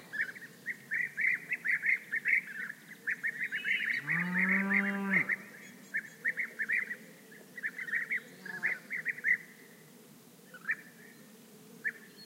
moo of a (distant) cow over a background of singing beeaters / mugido de una vaca lejana sobre fondo de abejarucos